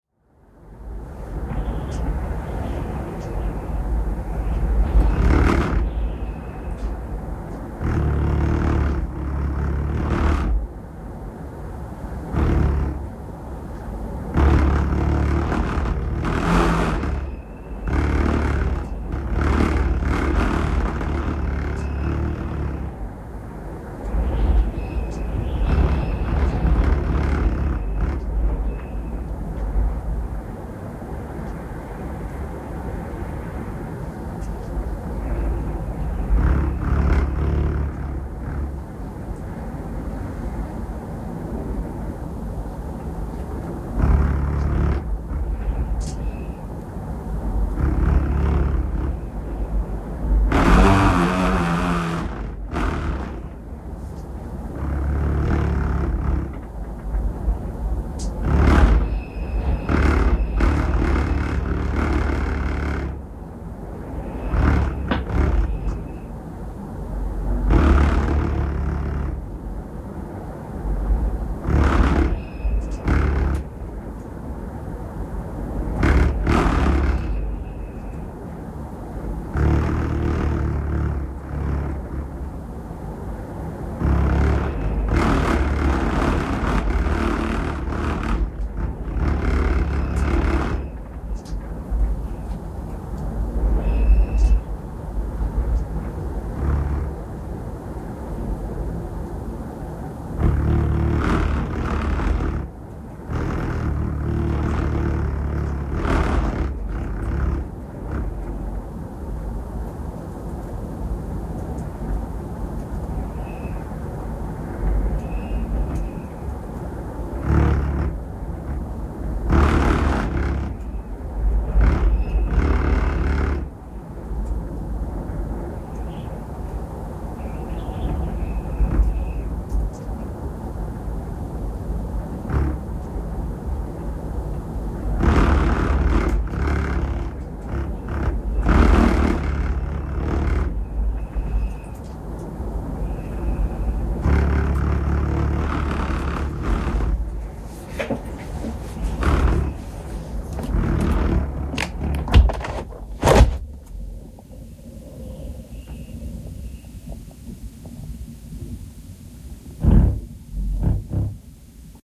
Storm Eunice from Open Window with Rattling Fluttering Roof Foil
Processed with compressor, limiter and EQ in Ableton Live 11.1, to make the sound fuller and more realistic.
In February 2022, storm Eunice blew across Europe, incl. here in the southern Limburg province of the Netherlands. Recorded from a room in a house, near a partly opened (tilted) window. A defining sound is the rattling, fluttering noise of a loosened foil underneath roof tiles.
wind, cyclone, gusts, storm-eunice, climate, open-window